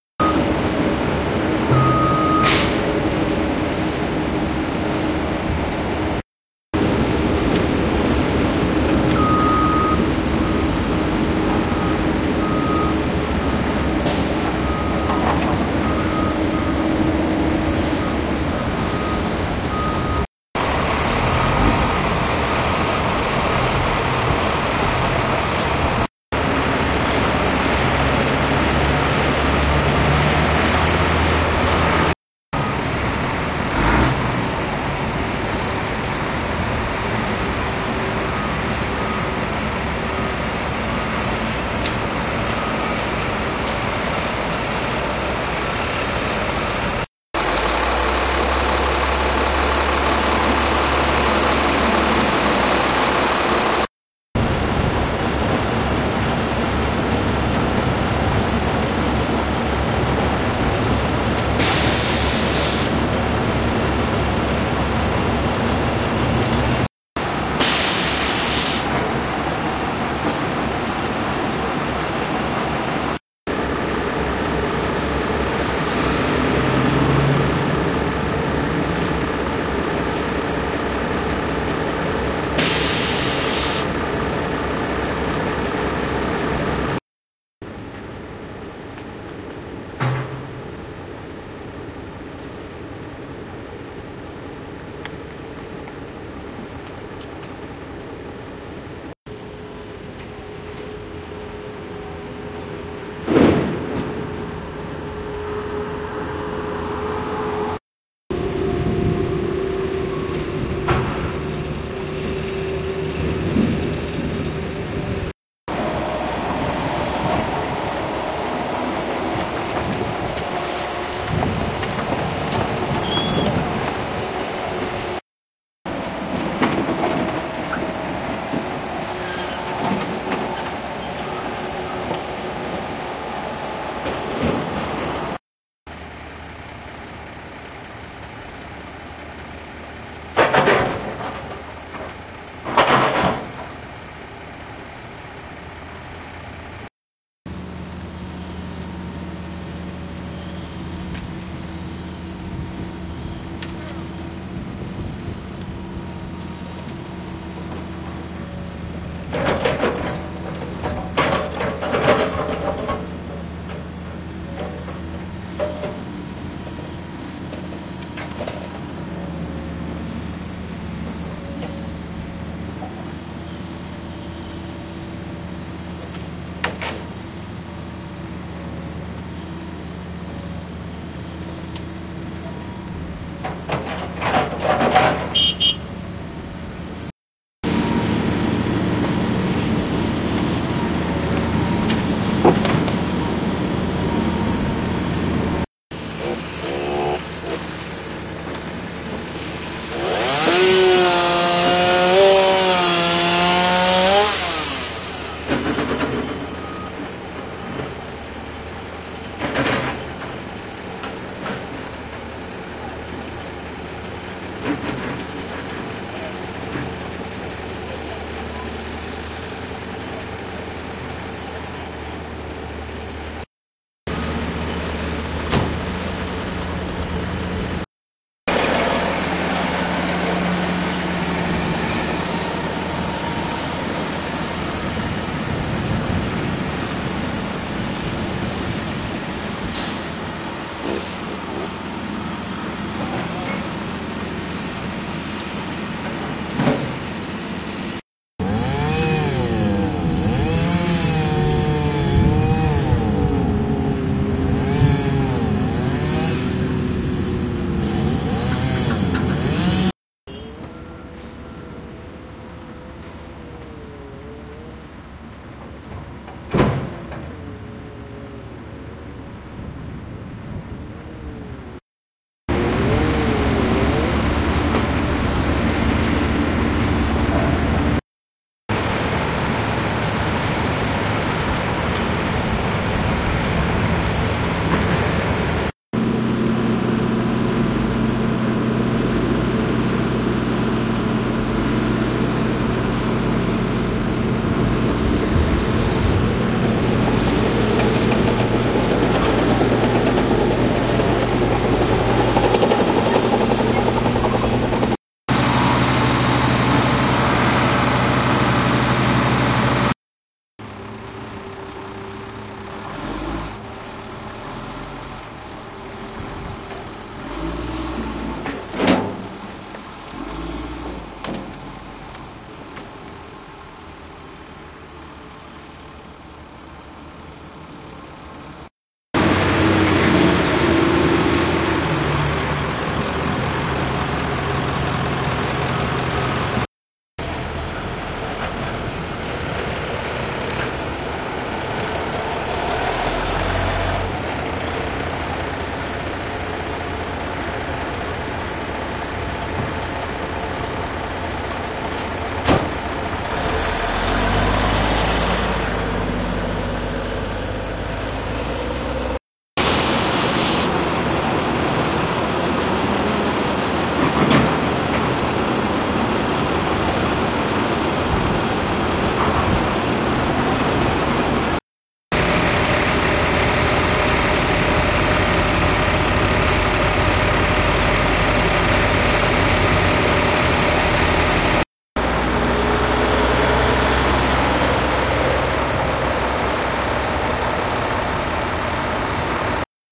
Sounds of a construction site at which an excavator is king.
excavator, steam, vehicle, grapple, engine, bucket, caterpillar, cylinder, heavy-equipment, bulldozer, loader, truck, hydraulic, construction-site, shovel